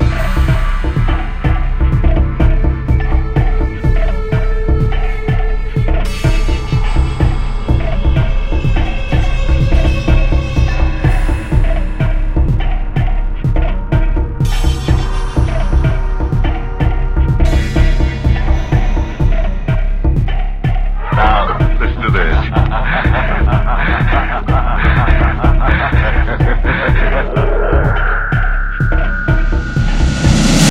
Listen To This 125bpm 16 Bar
Beat Mix with sample. Just listen!
Loops, Beats, Mixes, Samples